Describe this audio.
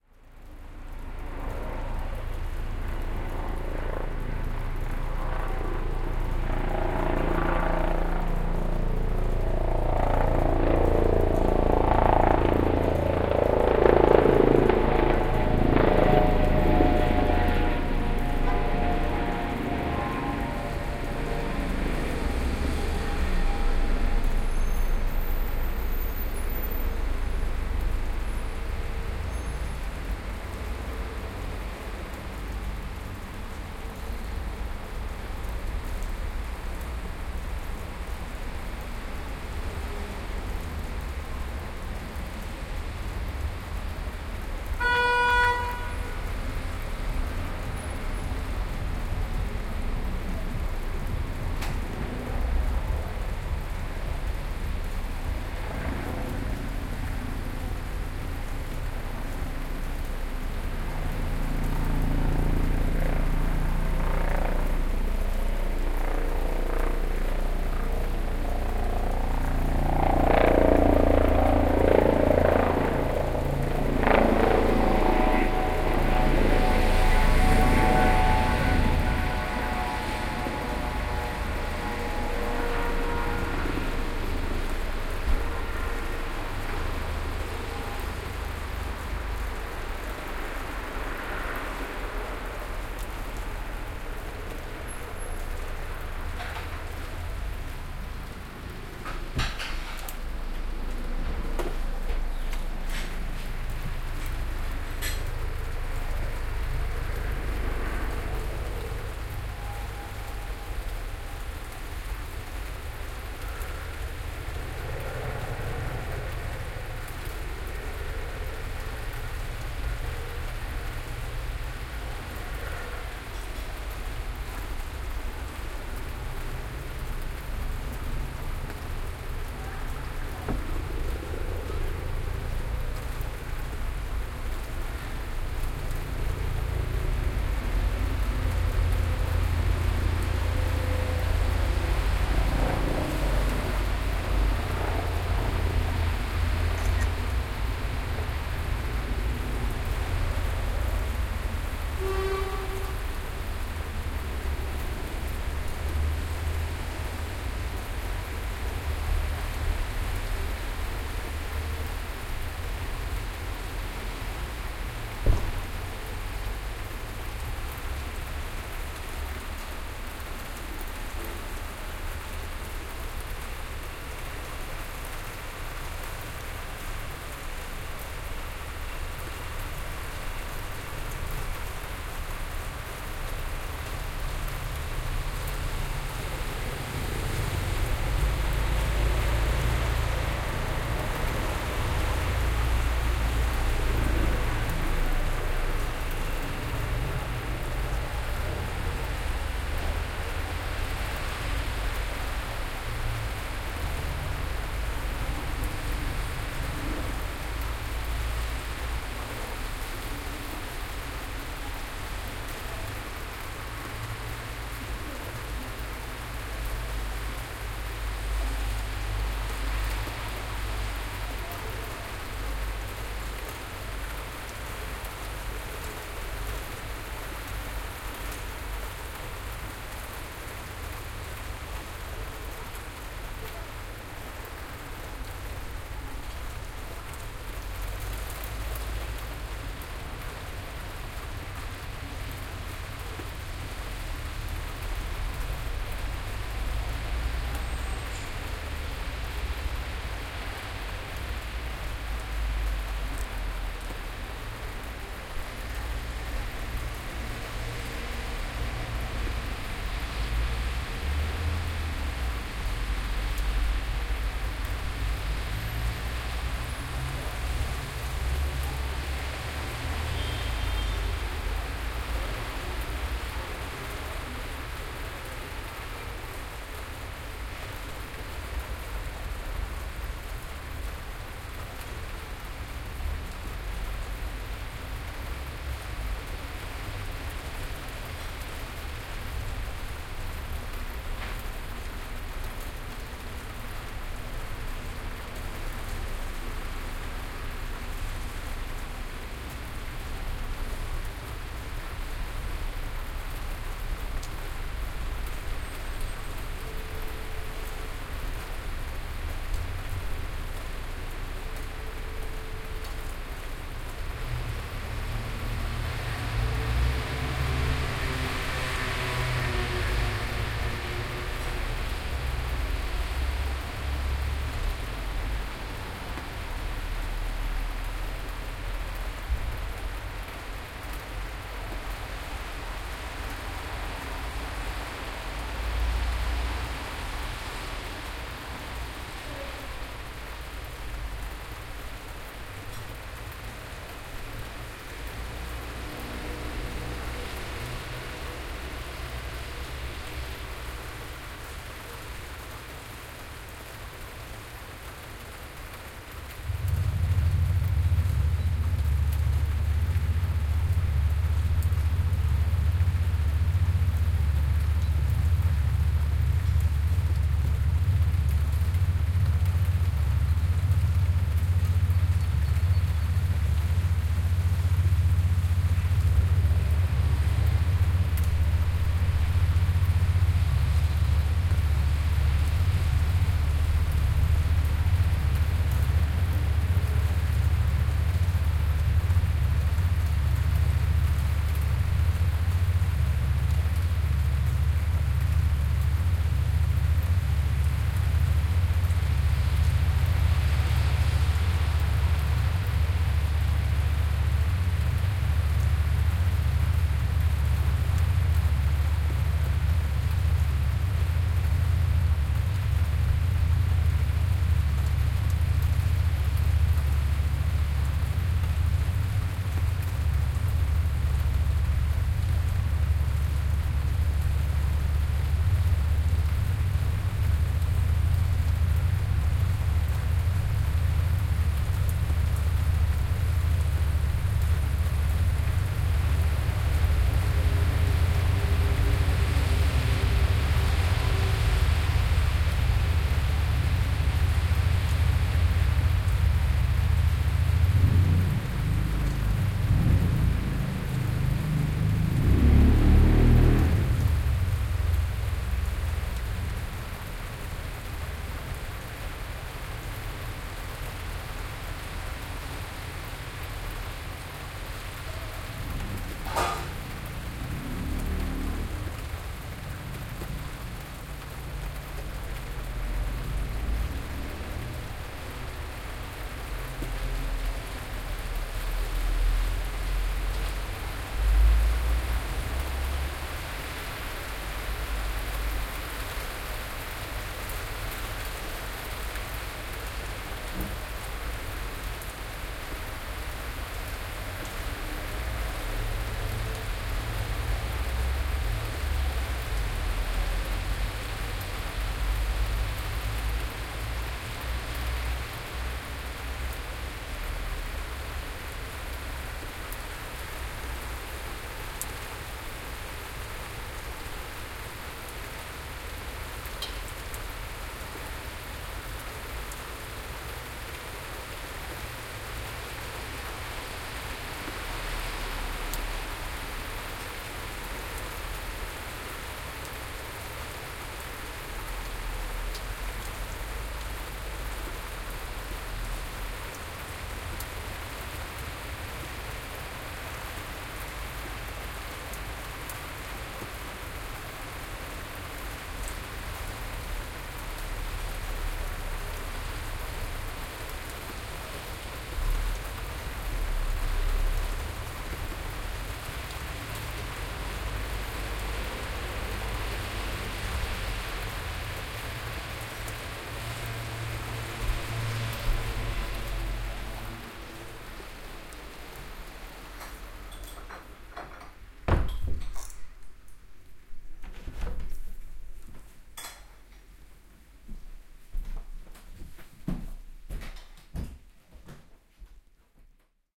Paris Helicopter from Paris Balcony
Binaural recording from my balcony in Paris, a Helicopter is flying circles near my flat.
it is a bit rainy
n.b. this is a BINAURAL recording with my OKM soundman microphones placed inside my ears, so for headphone use only (for best results)
ambiance, ambience, ambient, atmosphere, background-sound, binaural, city, confinement, corona, covid19, empty-spaces, engine, field-recording, helicopter, paris, rain, rainy, soundscape